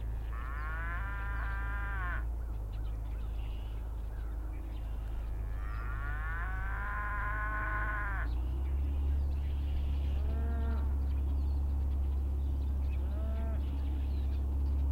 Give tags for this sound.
cows; distance; moo; mooing

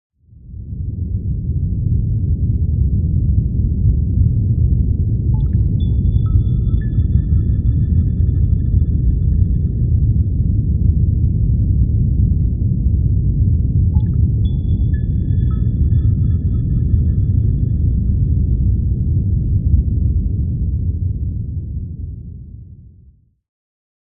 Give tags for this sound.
atmosphere; music; rumble; science-fiction; sci-fi; synth

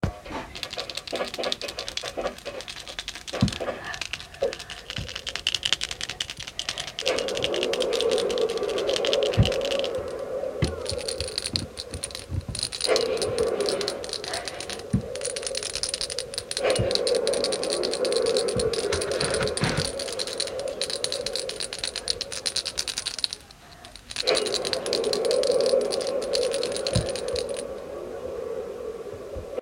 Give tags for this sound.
bell; nautical; oz

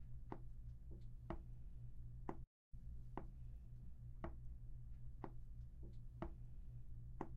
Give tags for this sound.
walking; footstep; walks; footsteps

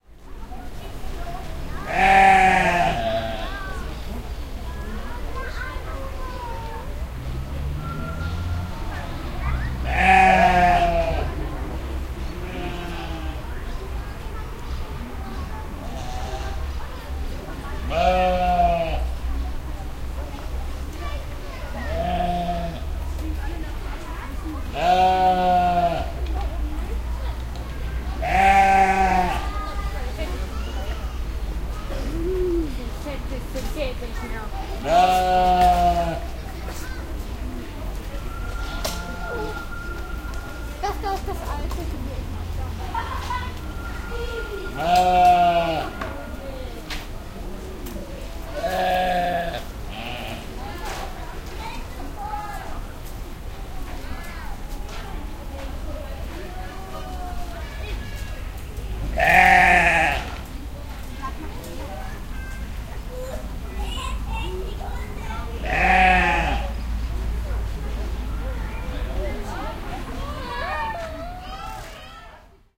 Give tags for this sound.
zoo; meh; sheep

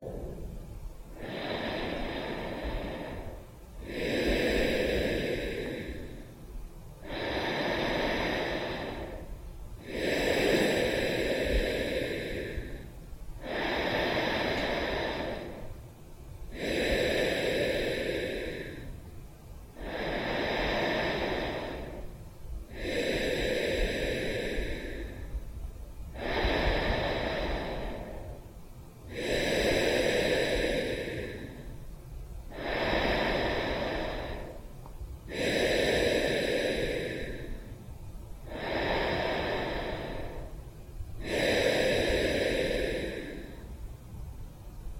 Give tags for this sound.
man; breathe; blowing; inhale; slowly; breathing; human; deep

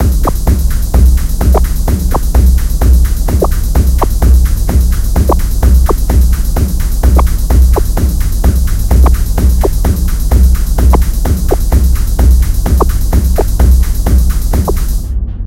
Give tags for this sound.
techno; tools; loop

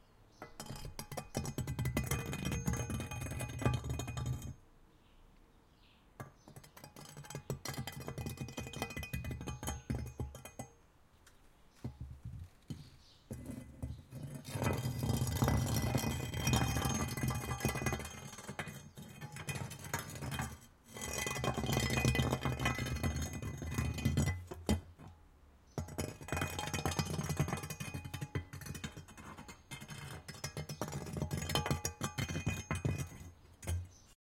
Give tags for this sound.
OWI; Metal; Cobblestone; Dragging; Rake

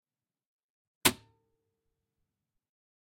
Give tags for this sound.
recorder; reel-to-reel; sound-effect; tape

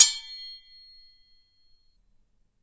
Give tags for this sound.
Action; Battle; Blade; Draw; Fight; Foley; Slice; Sword; Swords; War; Whoosh

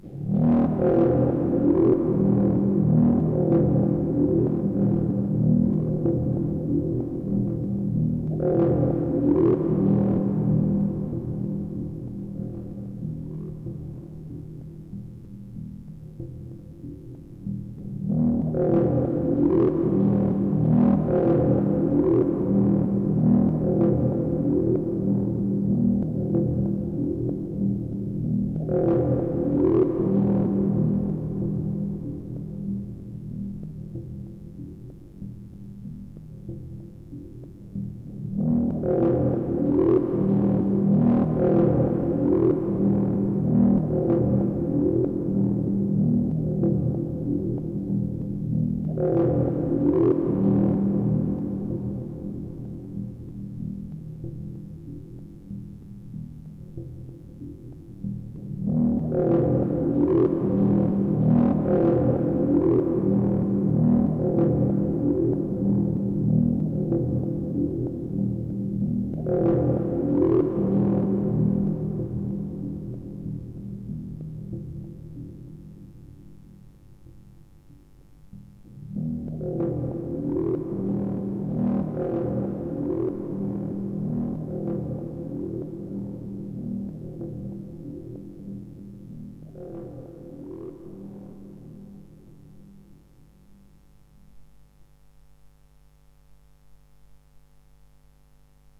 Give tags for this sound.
adventure; anxious; atmos; background; bogey; creepy; drama; dramatic; fear; fearful; ghost; haunted; hell; macabre; nightmare; phantom; scary; sinister; spectre; spooky; suspense; terrifying; terror; thrill; thriller; wavestate; weird

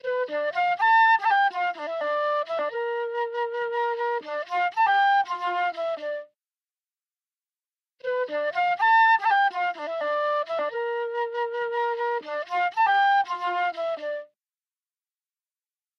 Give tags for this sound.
eastern; flute; fun; hip-hip; instrument; live; loop; music; rap; recording; rnb; smooth; trap; wind; wood-wind; woodwind

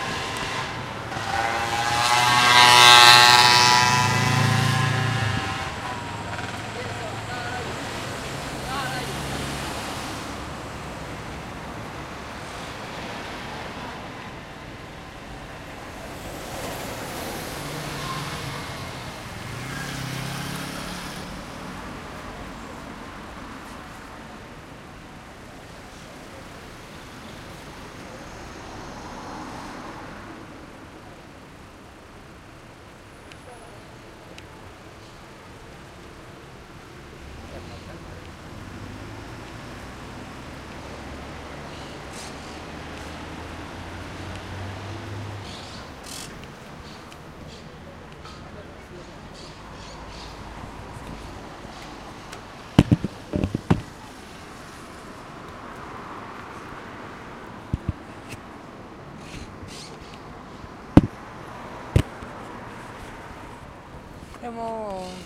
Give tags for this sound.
Motorbikes; Noisy; Transit

